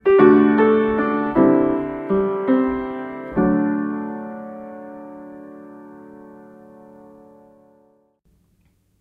Short Piano Intro 2
Short simple Piano intro for various use in movies and games. You can find more like these in my pack "Piano Intros"
;) Thank you!